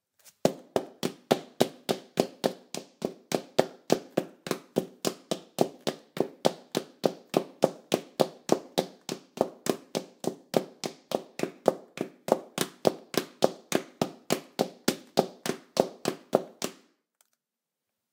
01-18 Footsteps, Tile, Female Heels, Running V2
Female in heels running on tile
fast, female, flats, footsteps, heels, kitchen, linoleum, running, tile